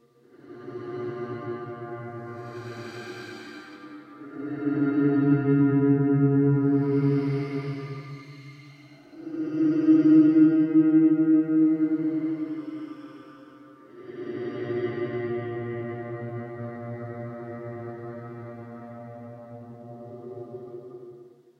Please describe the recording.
A horror sound for as a sound effect. I played smoke on the water on my guitar, recorded it and edited it in audacity. I reversed the audio, tuned the sound lower and speeded it up. This was the result, a creepy horror suspense sound.
ambient, anxious, creepy, evolving, film, freaky, haunted, horror, phantom, scary, soundtrack, spooky, suspense, terrifying